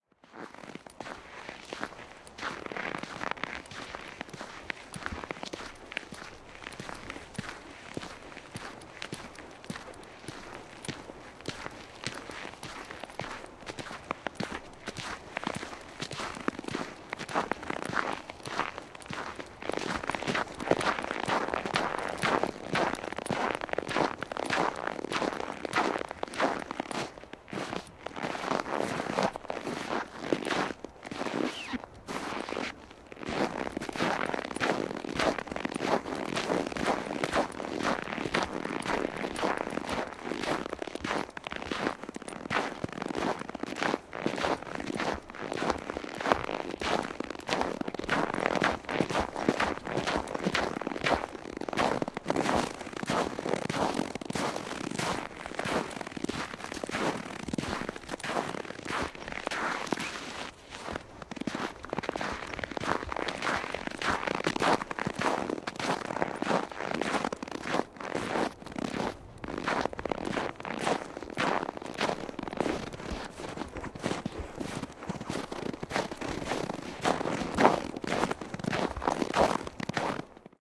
footsteps snow crunchy close metallic clink
Close recording of a single person's footsteps in the snow on a winter's day. The snow was dry, so the sound is crunchy. Clothes/zippers can be heard clinking. Recorded using a Neumann KMR 81i, sound devices 744 T.